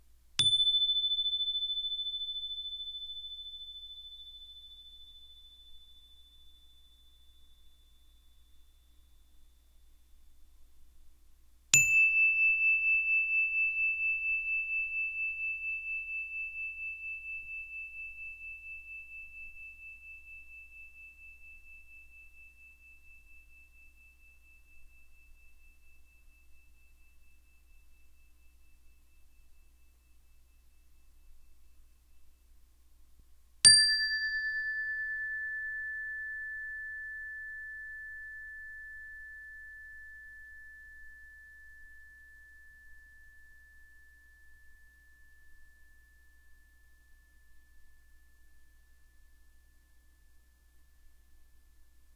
Three single note chimes in one file - three different notes.
wind-chime, chime, bell, chimes, ding